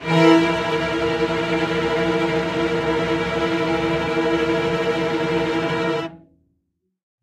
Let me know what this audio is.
High Tension/Thriller Music
Edited and mixed samples from the Versilian Studios Chamber Orchestra library.
cello, movie